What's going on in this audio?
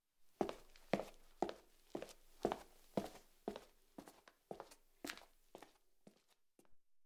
footsteps shoes walk hard floor stone patio nice
recorded with Sony PCM-D50, Tascam DAP1 DAT with AT835 stereo mic, or Zoom H2